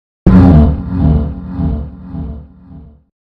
IW01-TribalSpaceHorn120bpm
Interstellar, Worlds, Sound-Design